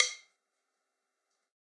Sticks of God 012
drumkit, real, stick